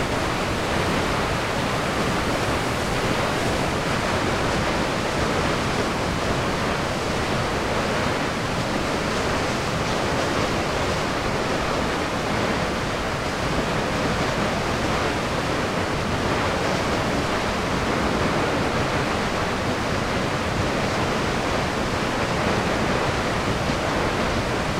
Latourelle falls in winter
Recording of the water hitting the bottom of Latourelle Falls.
stream, flickr, ambient, waterfall, water, noise, river, field-recording